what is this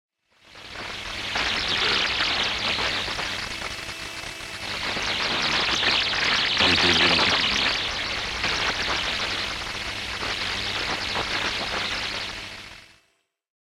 Radio Noisy Bubbles
some "natural" and due to hardware used radio interferences
interferences, radio